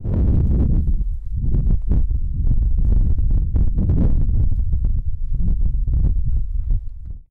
Viento Silbido 4
Sound generated by the appearance of the air in outdoor. Hard intensity level.
scl-upf13, whistle, wind